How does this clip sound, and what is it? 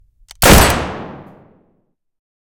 Perdition 1911 Pistol
I specifically designed this pistol to sound like Tom Hanks 1911 in Road to Perdition. I hope you enjoy.
Firearm, 1911, Pistol, Weapon, Gun